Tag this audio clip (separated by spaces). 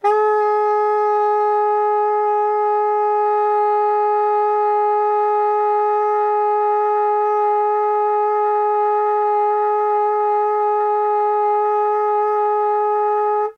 soprano-sax sax saxophone multiphonics